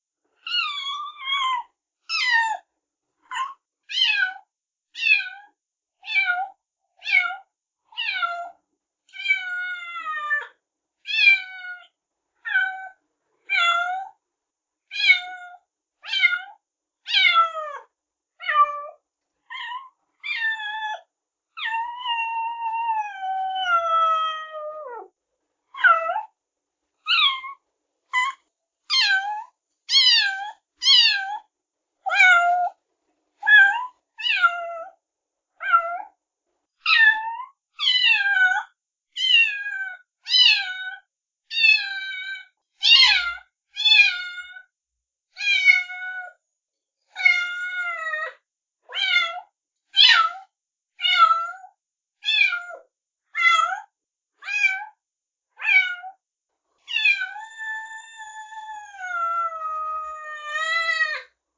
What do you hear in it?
Kitten meows
My 8 weeks young kitten trying to get attention. It succeeded.
Enhanced and cut with Audacity.
kitty, cat, cats, nature, field-recording, meow, pets, pet, kitten, cute, animal, animals, meowing